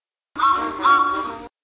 HELMS Bakery Truck Whistle CLIP TO WORK ON FILTER
Helms Bakery Truck Whistle actual whistle noise used at Helms Bakery from 1930 to 1969
Helms, Truck